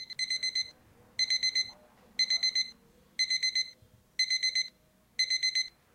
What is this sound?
alarm clo
clock, ring